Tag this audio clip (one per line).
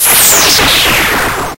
8-bit
arcade
chip
chippy
decimated
lo-fi
noise
retro
video-game